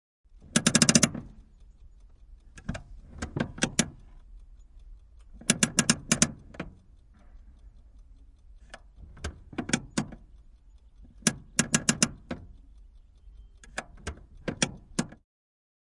Käsijarru, vanha henkilöauto / Handbrake, old car, clicks, crackles and creaks, interior, close sound, Pobeda, a 1957 model
Käsijarru päälle ja pois muutaman kerran, raksuttava ääni, narahduksia ja naksahduksia, lähiääni, sisä. Pobeda, vm 1957.
Paikka/Place: Suomi / Finland / Noormarkku
Aika/Date: 05.08.1996